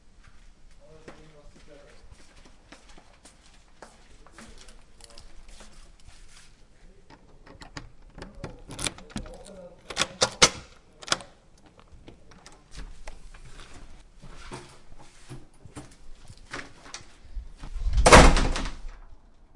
opening door ivo
Ivo opening his room with keys and closing door.
closed, door, footsteps, keys, opening, room